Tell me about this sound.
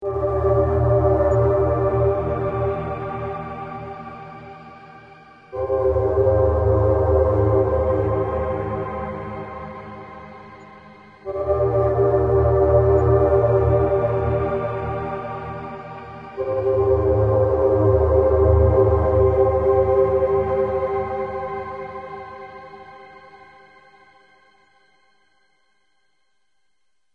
A couple of chords played through a home-made granular plug-in, sounds coming from a Nord Modular synthesizer. Somewhat ghostly but beautiful.
ambient, deep, digital, ethereal, ghostly, granular, quiet, sound-design